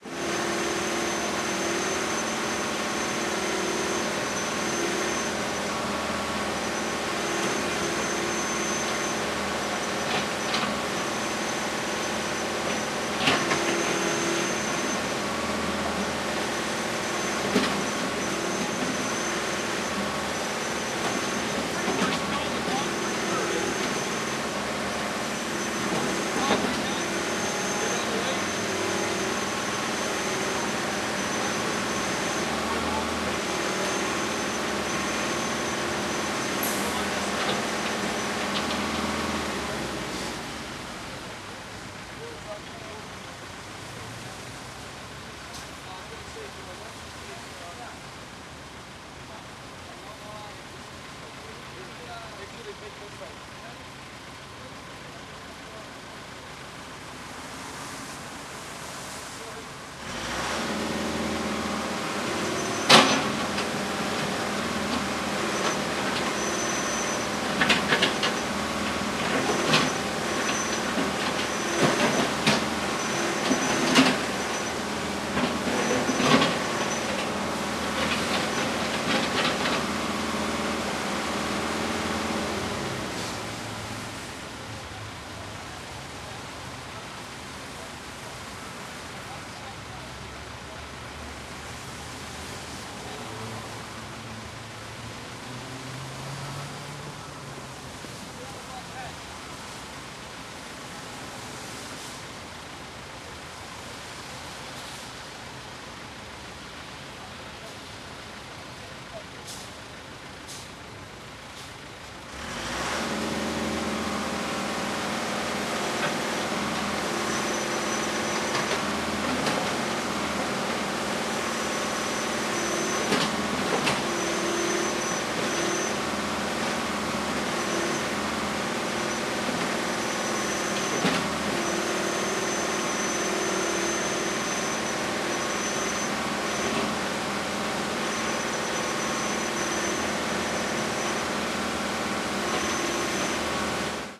Machinery Construction working
Construction saw basic-waveform machinery
basic-waveform, Construction, machinery, saw